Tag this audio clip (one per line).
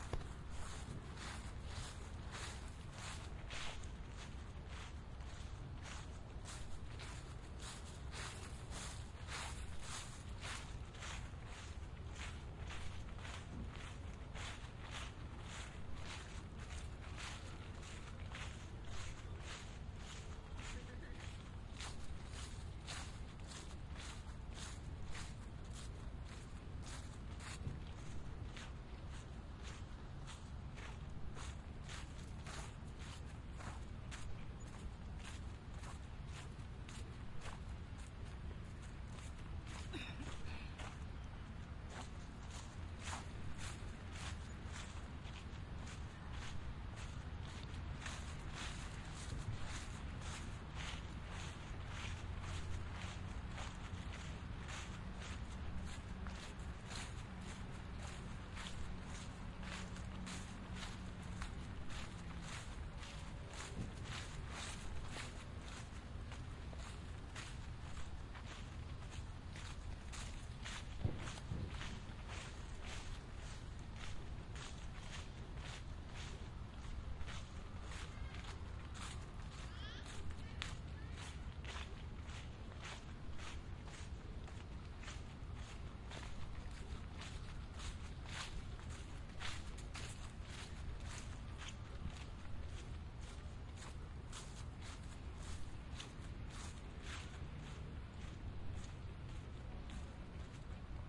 dry
stereo
field-recording
binaural
crunch
autumn
walk
leaves
walking